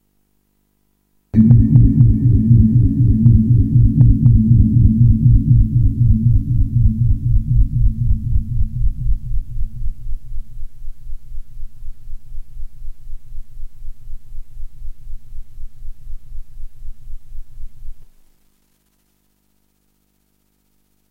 This sound is generated by an 80's synthesizer ensoniq sq1 plus which memory banks have gone bad. I recorded the sound because I thought that it would be excellent as a creepy sci-fi spaceship sound
sci-fi, drone, scifi, submarine, engine, spaceship, scary, space, artificial, creepy, horror